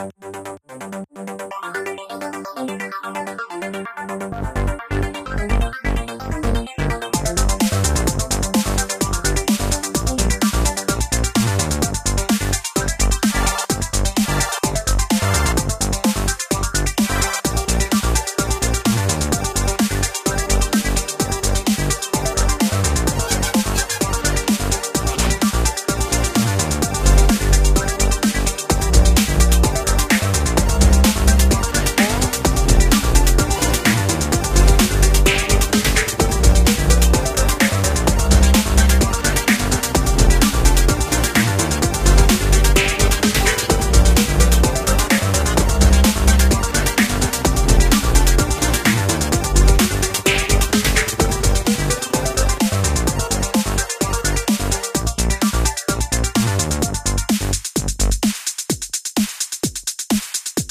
(Retro game) video game like tune. you can use this for whatever you wanna use it for! enjoy
made with splash